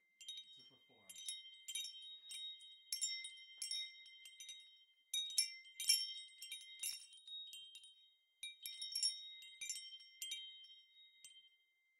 After 12 years it was time to contribute to this wonderful website. Some recordings of my mother's wind chimes.
They are wooden, metal, or plastic and i recorded them with a sm7b, focusrite preamp. unedited and unprocessed, though trimmed.
I'll try to record them all.
knock, metal, metallic, percussive, unprocessed, wind-chime, windchime